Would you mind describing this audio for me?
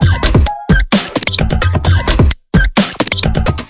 Hiphop/beats made with flstudio12/reaktor/omnisphere2